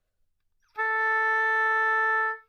Part of the Good-sounds dataset of monophonic instrumental sounds.
instrument::oboe
note::A
octave::4
midi note::57
good-sounds-id::7969